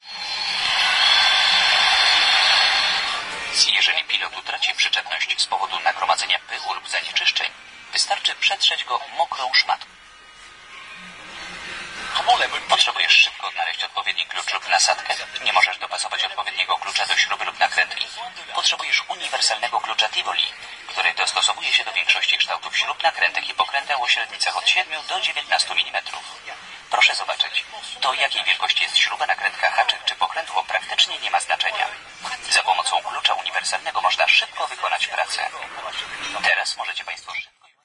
29.11.09: about 17.00 in the Castorama supermarket (supermarket with building materials) on Gronowa street in Poznań (Winogrady district) in Poland. The tools section. On a little TV screen the instructional video is playing. no processing (only fade in/out)
building-materials, castorama, field-recording, instructional-video, movie, poland, poznan, supermarket